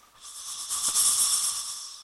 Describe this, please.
snake hss effect
Recorded with Pc with the mouth sound
effect, snake, sound